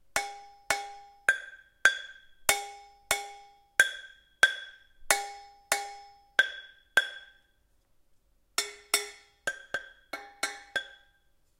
Kitchen Pot 03
This recording is from a range of SFX I recorded for a piece of music I composed using only stuff that I found in my kitchen.
Recorded using a Roland R-26 portable recorder.
Foley, Cooking, Home, Kitchen, Household, House, Indoors, Percussion